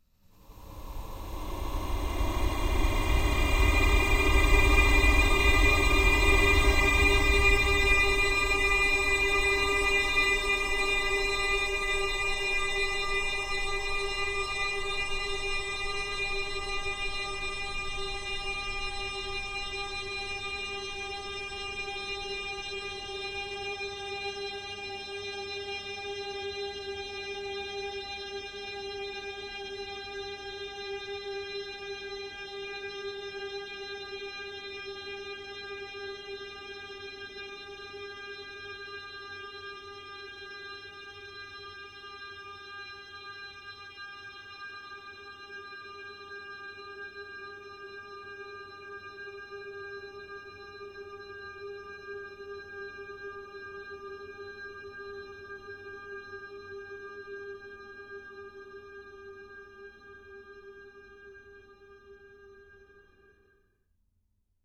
ambience 04 white
Sound 4/4, the highest note - thus white, of my epic ambience pack.
Created in Audacity by recording 4 strings of a violine, slowing down tempo, boosting bass frequencies with an equalizer and finally paulstretch. Silence has been truncated and endings are faded.
atmosphere, deaf, suspense, numb, ambience, thrill, ambiance, atmos, space, ambient, dramatic, speechless, tension, drone, soundscape